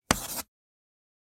writing-chalk-oneshot-02
01.24.17: Cut up samples of writing with chalk on a blackboard.
blackboard, chalk, chalkboard, class, draw, drawing, motion, school, teacher, text, write, writing